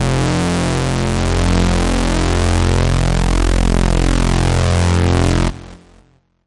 SemiQ leads 10.
This sound belongs to a mini pack sounds could be used for rave or nuerofunk genres
pad, synth, electronic, strange